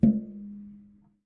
Softly kicking a wheelbarrow, creating a longer resonance.
wheelbarrow, metal, hit, percussive, kick